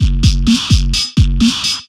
Industrial-style beat
Slightly processed beat done using FL Studio. Enjoy!
128-bpm, electro